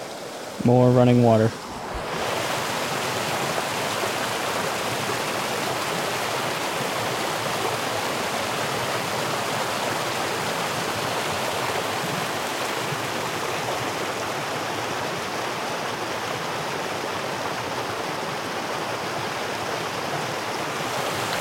Water sounds rushing river